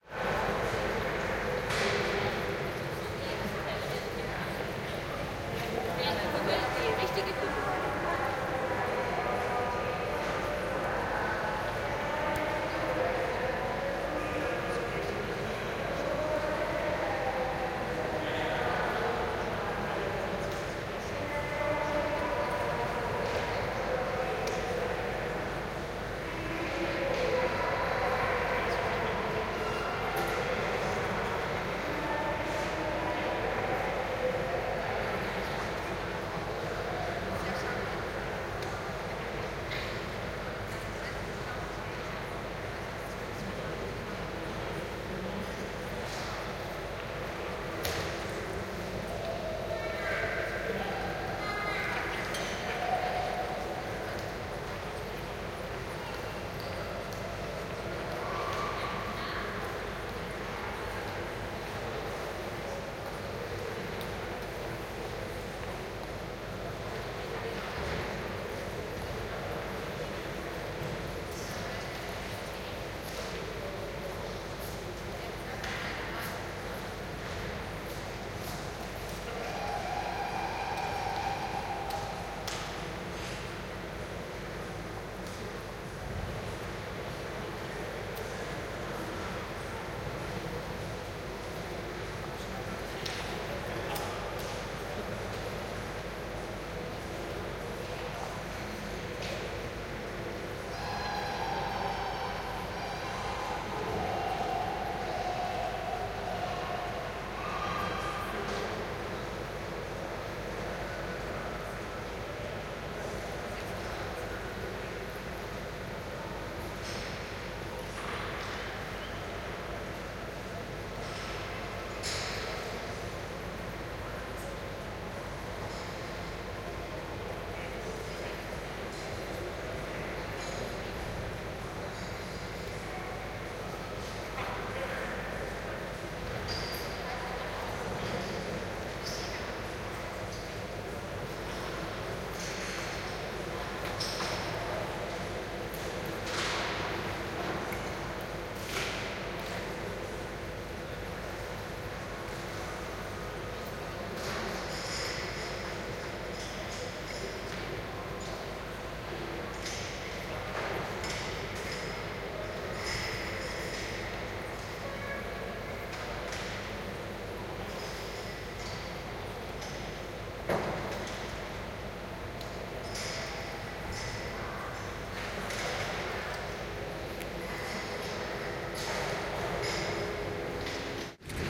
20080229 airport Niederrhei
Binaural recording of the waiting lobby of the Ryanair airport in Niederrhein.
29-02-2008
talking, binaural, people, airport, niederrhein, large, field-recording, hall